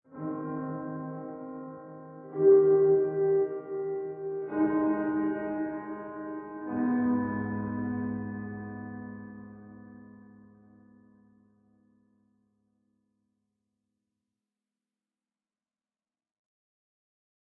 ghost piano 1
I guess people liked the original ghost piano. NO, I didn't rip it off, and here, I re-mastered it just to prove it. It's actually not that complicated if you have a DAW - it's just a piano with massive reverb and NO dry mix at all. So you hear the reverb but not the sound making the reverb - that's what makes it a "ghost" piano, get it?
This is the same bridge as the original, but higher quality and with the full reverb tail.
creepy, ghost, haunted, piano, reverb, spooky